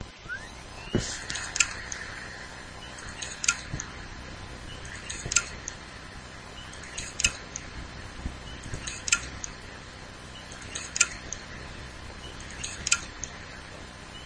fujitsu 60gb sweeping
blast of signals and click glitch shit